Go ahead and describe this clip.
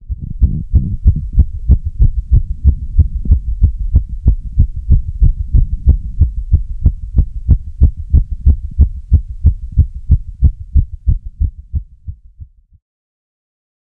HEARTBEAT-FAST
Heavily compressed heartbeat taken from a sample after jumping jacks... approx. 180 bpm.
after, beat, blood, bpm, e-health, exercise, fast, health, heart, heart-beat, heartbeat, heavy, medium, pounding, rhythm, scare, slow, speed, sthetoscope, thrill, tired